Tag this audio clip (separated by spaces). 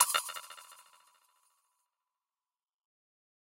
Abstract Dripping Drops Metal Metallic Sound-Effect